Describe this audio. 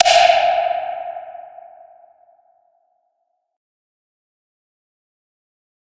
rim shot 1
a dark and distorted rim shot sound
dark, distorted, hit, reverb, rim, sample, shot, sound, wave